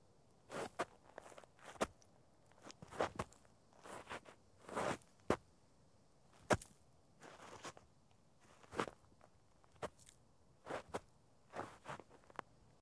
Foot Drags Dirt 2
Standing in place kicking dirt to simulate walking or running.
footstep, tennis, steps, foley, walking, step, tennis-shoes, running, walk, dirt, stepping, footsteps, foot, ground, feet, shoes